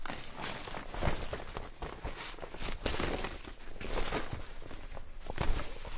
Picking up a duffle bag
Bag, Duffle, Lift